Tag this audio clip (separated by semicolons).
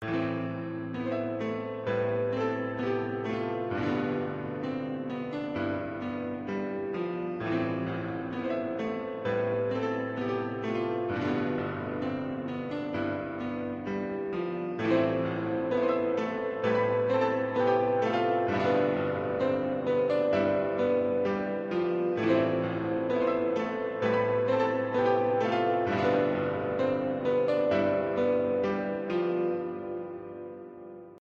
cinematic; Piano; sad